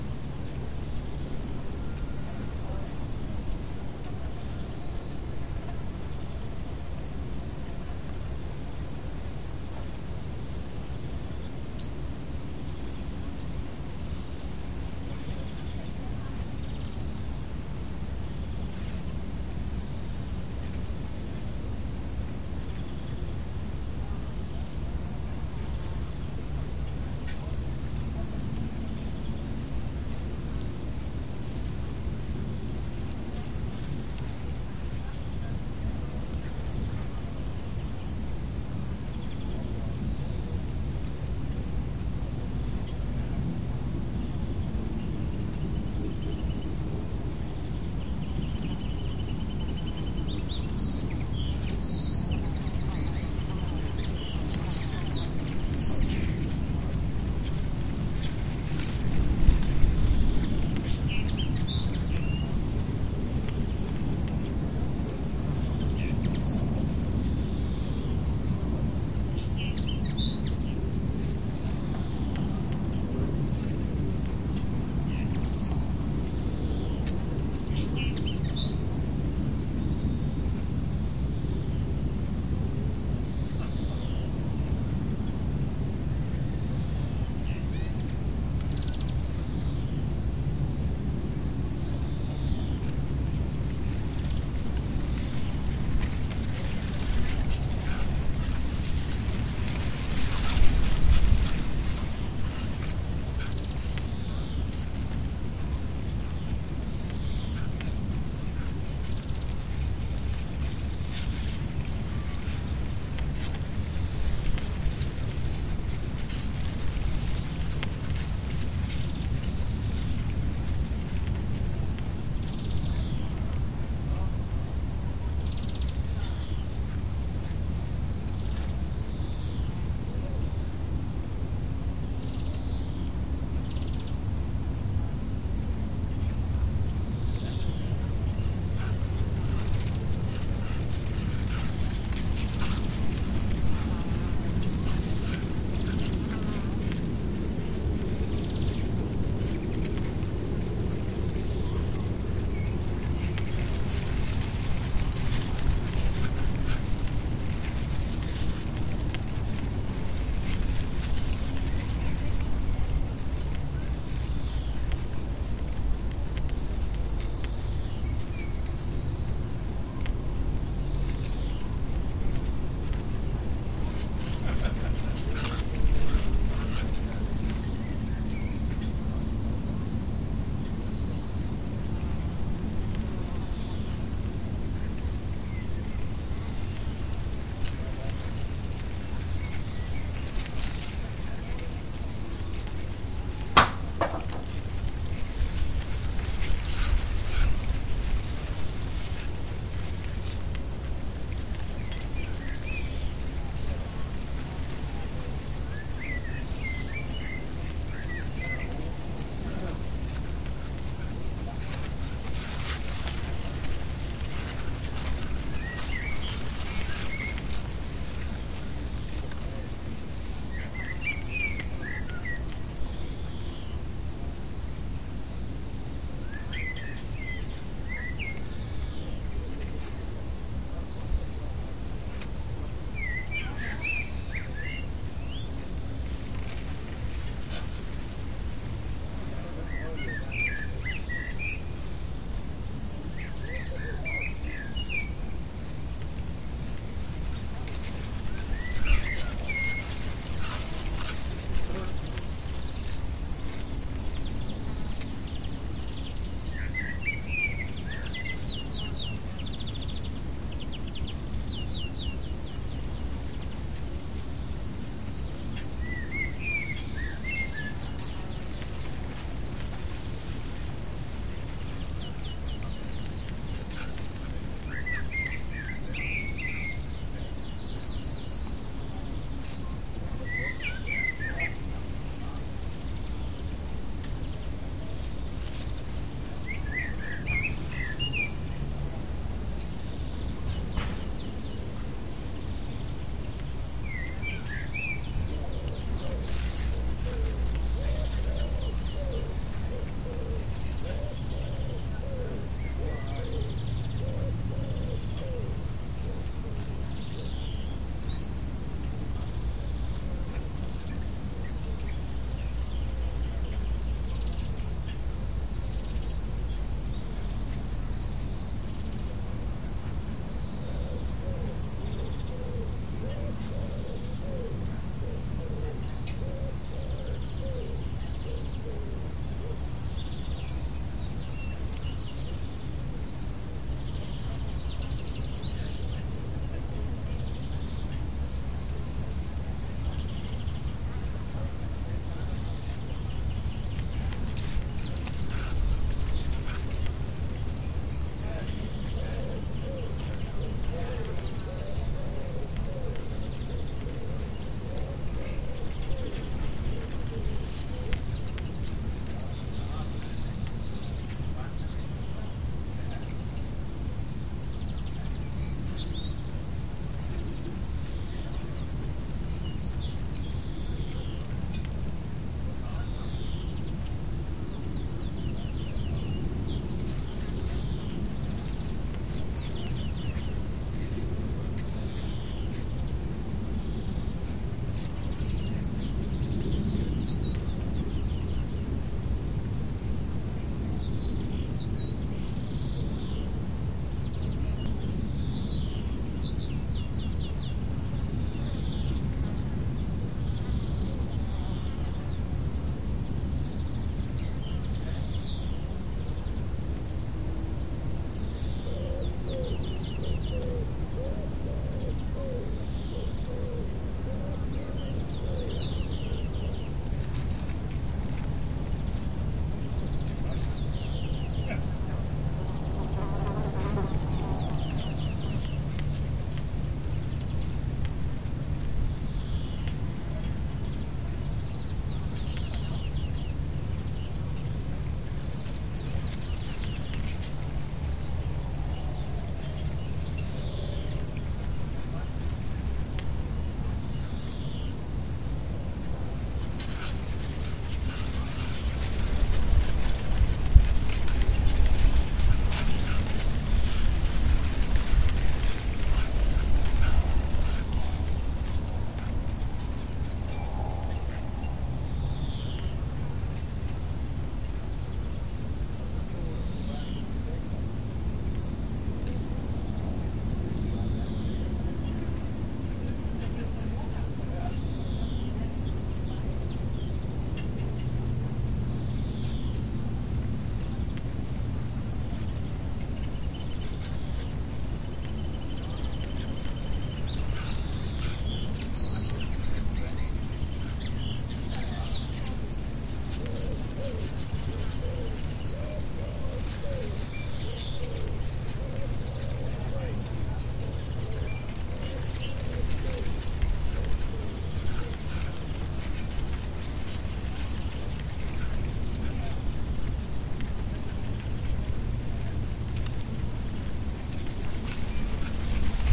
Corn field and birds
Ambience in the border of a corn field. Birds can be heard while also the sound of the light wind in the corn and from time to time some insects. The nearby lawn was heavily infested with wasps, but they did not sound to care so much about an audio recorder.
Recorded with a Tascam DR-40 with narrow stereo field.
Recorded at 15:10:30 local summer time the 25th of July 2018.
twittering, birds, field